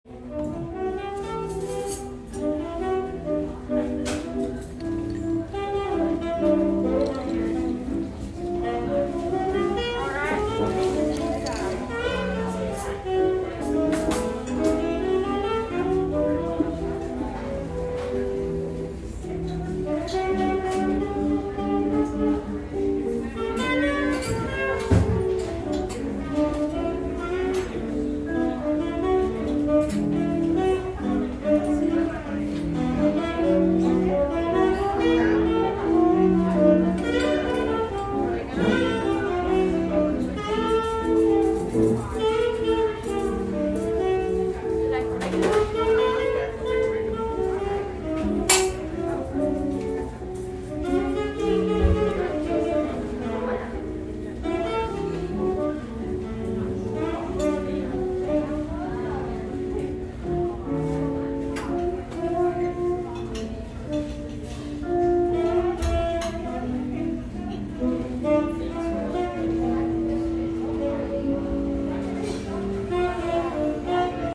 Recorded: February 2022
Location: Fancy Restaurant
Content: Restaurant sounds and ambiance with jazz music in background